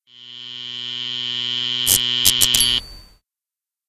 Light-bulb overloading and filament burning through.
50Hz hum increasing in volume ending in the ping of a lightbulb burning through due to overload of voltage or old age.
bulb, burning, electricity, filament, hum, light, through